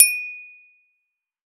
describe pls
2022-01-14-crystal-bell-08
A moderately-sized bell of crystal glass.
Recorded with Redmi Note 5 phone, denoised and filtered bogus sub bass in Audacity.
bell, chime, crystal-bell, crystal-glass, ding, glass, one-shot